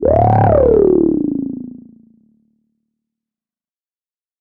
Blip Random: C2 note, random short blip sounds from Synplant. Sampled into Ableton as atonal as possible with a bit of effects, compression using PSP Compressor2 and PSP Warmer. Random seeds in Synplant, and very little other effects used. Crazy sounds is what I do.
110, acid, blip, bounce, bpm, club, dance, dark, effect, electro, electronic, glitch, glitch-hop, hardcore, house, lead, noise, porn-core, processed, random, rave, resonance, sci-fi, sound, synth, synthesizer, techno, trance